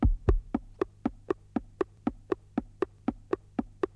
A little work based on Floyd's Time, heart & metronome.
Floyd, heart, metronome